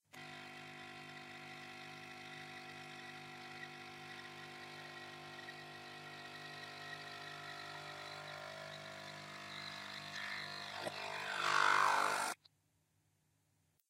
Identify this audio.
Nespresso machine brewing coffee
The sound of an Espresso machine while it's making coffee
Brewing, Nespresso, coffee